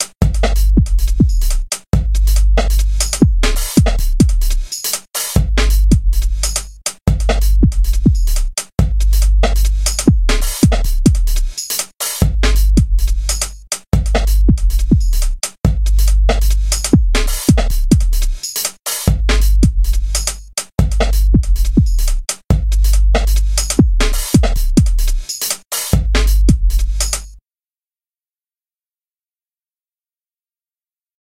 valine-drums
valine, drums, track, full